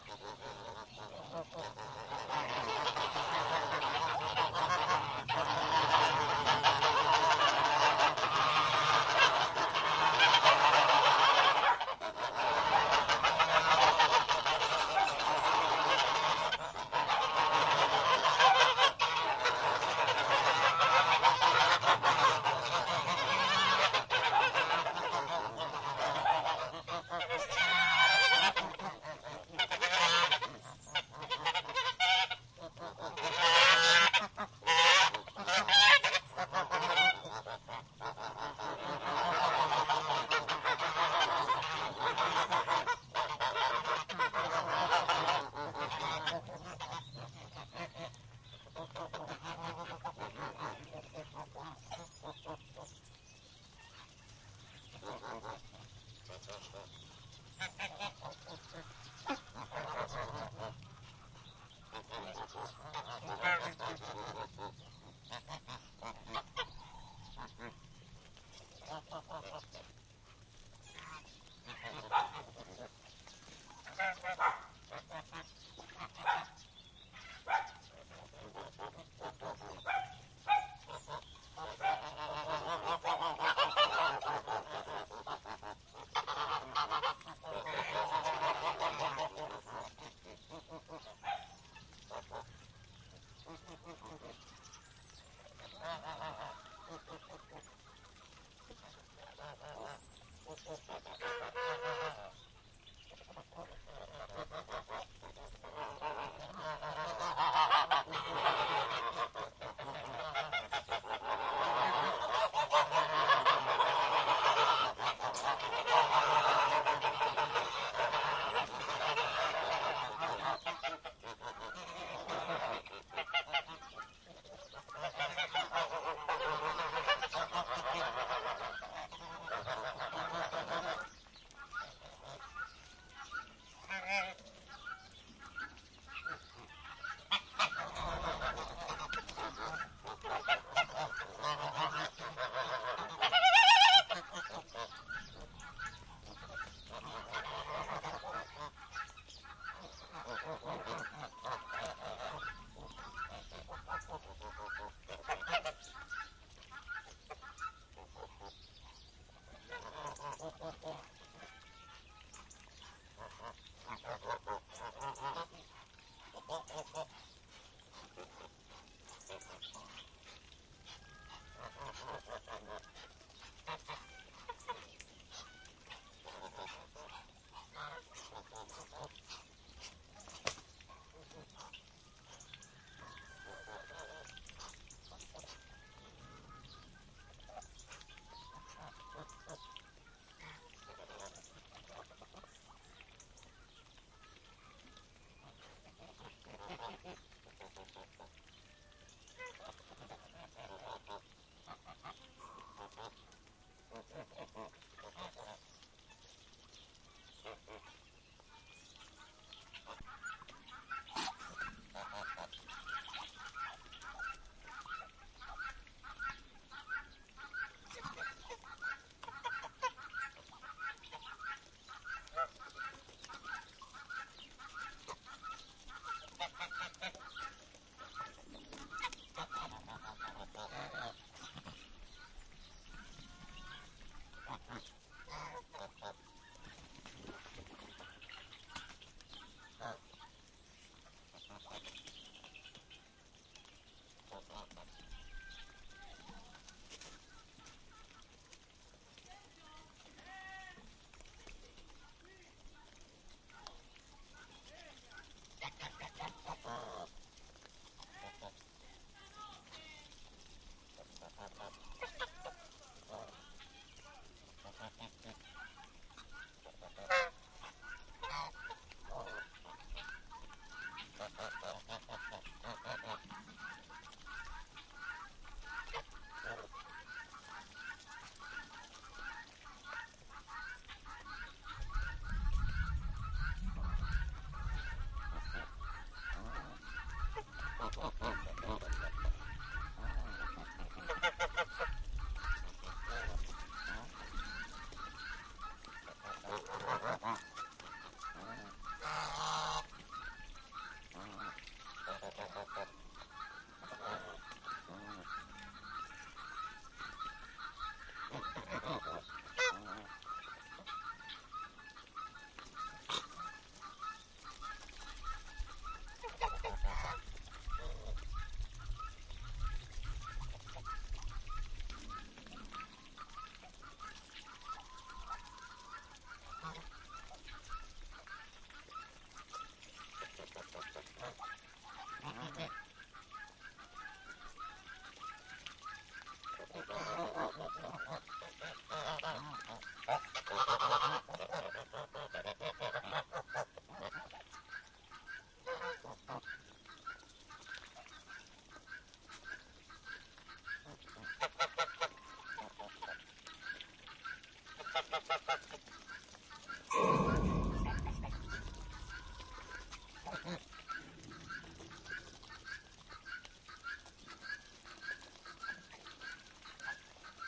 animal farm castiadas
Tribute to George Orwell's "animal farm". Castiadas Agriturismo Orchestra near Cagliari (Italy). The animals are perturbed and worried by my presence then get used to it and stop screaming. Rural life goes on. Sony ECM 719 stereo mic
soundscape, chicken, farmyard, field-recording, duck, dog, farm, animal, rural